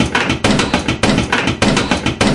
.SONY DICTAPHONE : sampling incongruous objects in collision,creation of sample.
.ADOBE AUDITION : reduction of noise of the sample.
.RECYCLE software : isolation of elements of sample for creation of soundkit.
.SOUNDFORGE 7 : creation final of drumloop (cut....).
beat, beats, drum, drumloop, drumloops, drums, field, idm, loop, loops, recording, recordings, sampling